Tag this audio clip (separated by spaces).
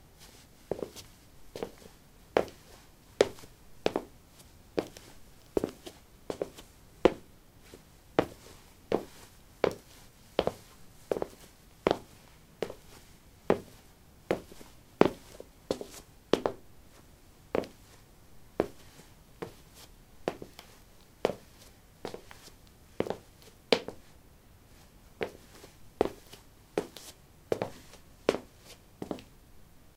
footstep footsteps step steps walk walking